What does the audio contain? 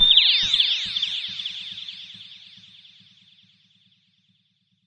WEIRD SYNTH STING 01
A very high-pitched synth sound effect created in Cool Edit Pro. The effect has a slight bird-like quality to it. This sound actually shattered a wine glass which had been left near a speaker.
bird, sting, sweep, synth